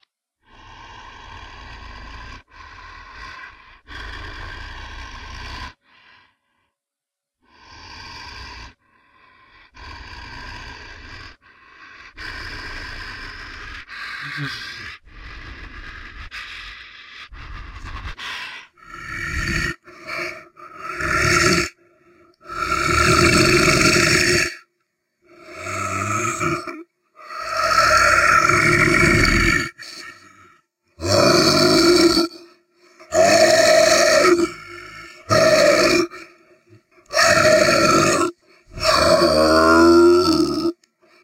Zombie groans
Recorded my voice with ATR2100 Microphone, lowered pitch and applied slight chorus effect in FL Studio.
growl, solo, moaning, moan, beast, single, snarl, angry, undead, dead-season, monster, male, zombie